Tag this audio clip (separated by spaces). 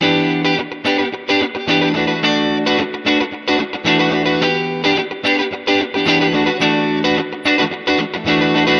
chords clean funk guitar two